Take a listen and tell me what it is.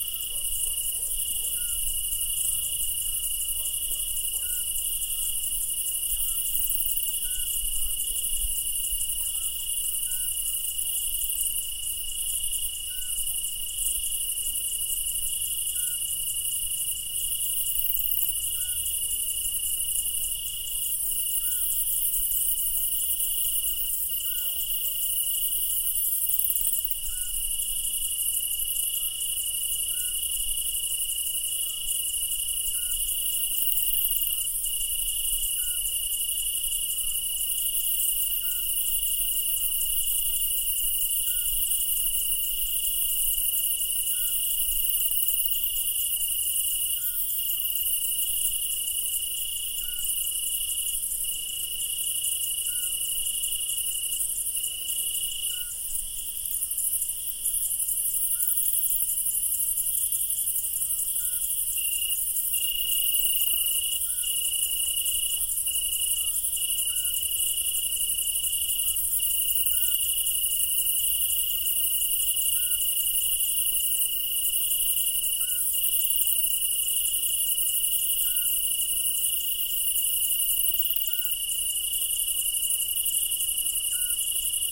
Italien - Sommernacht - Toskana - Grillen
A summernight in Italy. Summer 2013, near Grosseto in Toscana.
Italy, cricket, toscana, chirring, summernight